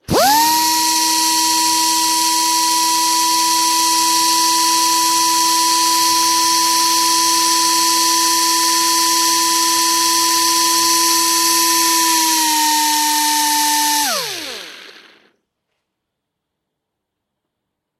Straight die grinder - Hempstead Ata Hemel st24le - Run
Hempstead Ata Hemel st24le straight die grinder running freely.
6bar, 80bpm, air-pressure, concrete-music, crafts, hempstead-ata-hemel, labor, metalwork, motor, pneumatic, pneumatic-tools, straight-die-grinder, tools, work